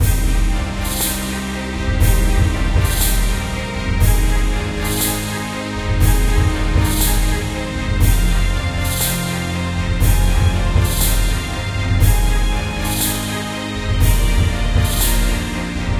An intense Orchestral piece. Loop was created by me with nothing but sequenced instruments within Logic Pro X.
epic, loop, song, strings